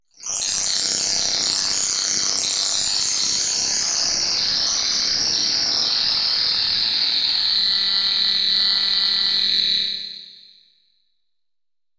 Granulated and comb filtered metallic hit